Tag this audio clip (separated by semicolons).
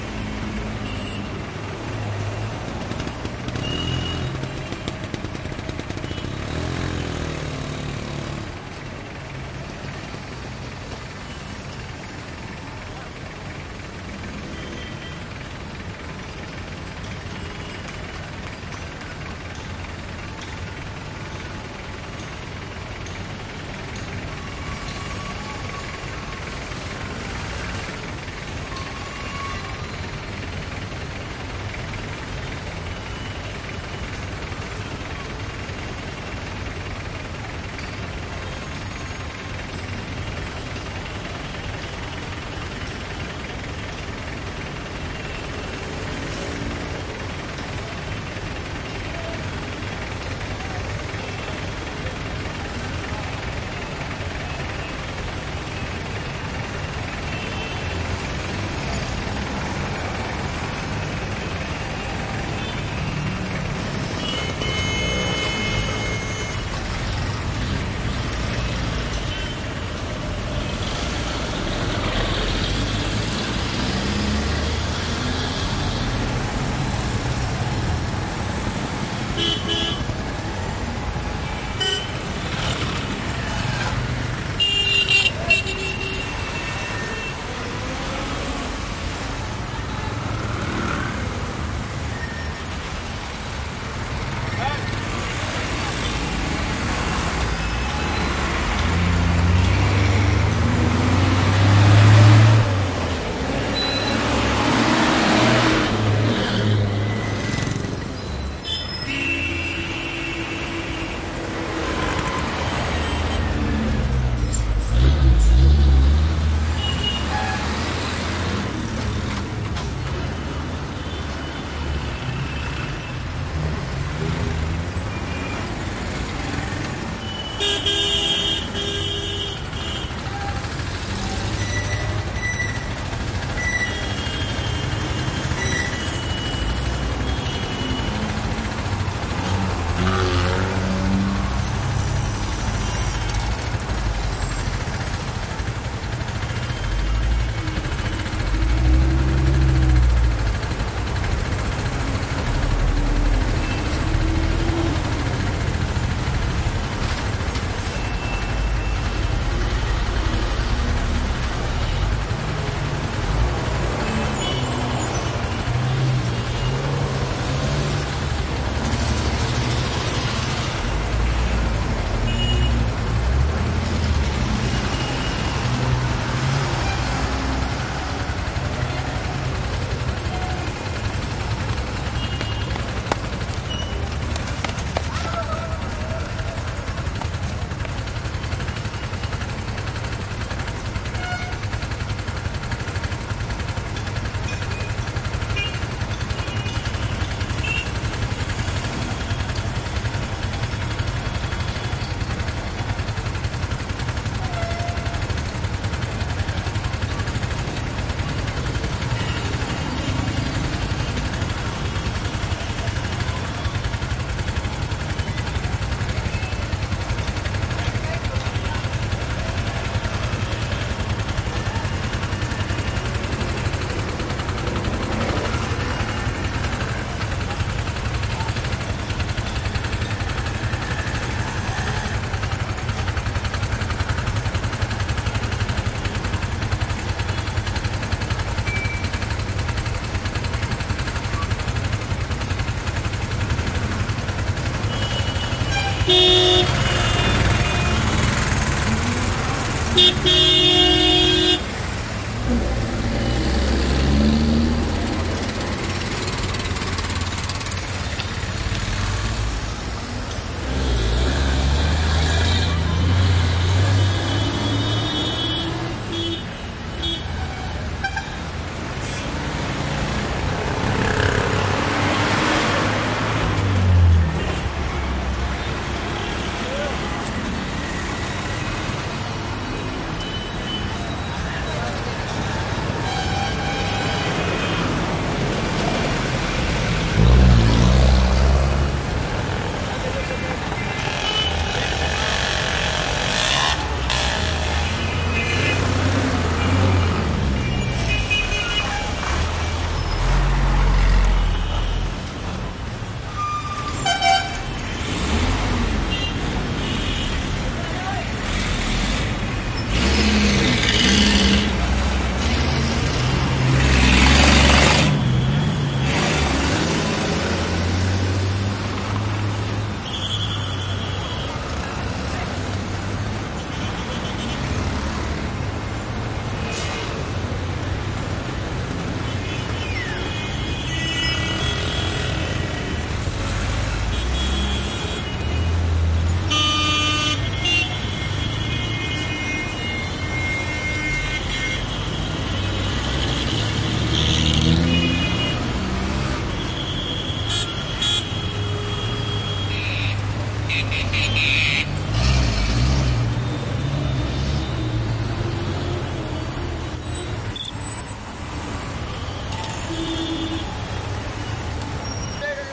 India; intersection; trucks; gutteral; gridlock; motorcycles; traffic; idling; heavy; throaty; mopeds; rickshaws; cars